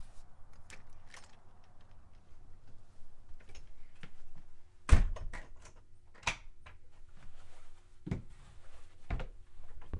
Back-Door Close & Lock
Stepping inside of the house and close/locking my door.
lock, close